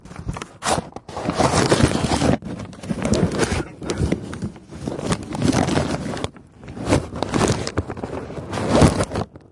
Stereo microphone handling sound.